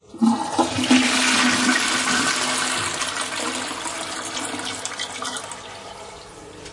Toilets flush
flush, toilet, restroom, water